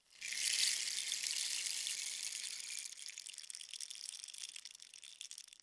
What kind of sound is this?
Palo de lluvia single short sound
sounds recorded with an akg c3000 in my home studio.